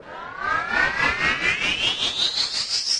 This is a short little transforming sound made in Cubase 5 LE with one of HalionOne's present pads mixed with a transformer and an automated tremolo